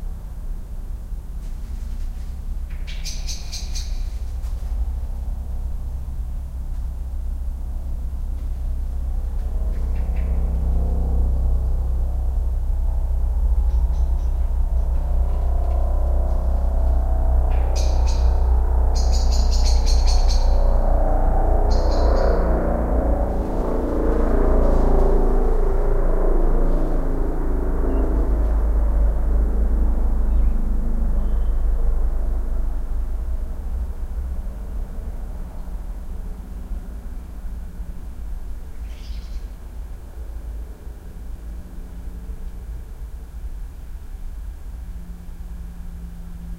Helicopter flying over a backyard in town, so not much notice before of it coming.
field-recording, plane, helicopter